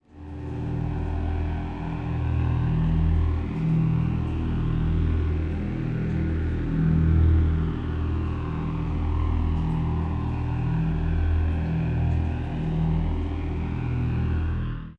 thx to them and have fun.
drone, suspense, scary, dark, humming